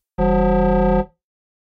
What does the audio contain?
Synthesized version of drone blip sound from Oblivion (2013) movie.
Synth: U-HE Zebra
Processing: none
drone, communication, blip, oblivion, signal